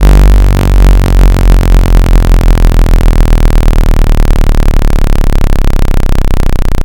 Angry sounding sub bass saw-tooth and sine wave wobble down!
I used audacity to generate two chirps starting at frequency 49 and finishing at 30.87 creating a slide from note G to B. On the sawtooth I then applied another sliding pitch shift down full octave creating a nice and nasty wobble down sound! Cool sound effect or neat little loop, 4 bars in length at 140 bpm